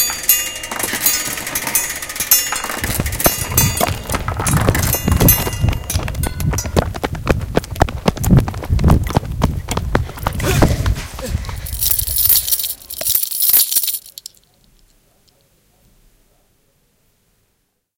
SoundScape RB MelaniaBryan
Soundscape made by pupils at the Ramon Berenguer school, Santa Coloma, Catalunya, Spain; with sounds recorded by pupils at Humpry David, UK; Mobi and Wispelberg, Belgium.
bryan, melania, ramon-berenguer, soundscape